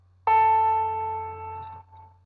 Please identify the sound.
Piano a4 sound
sound of a4 piano key
a, a4, normal, note, piano, short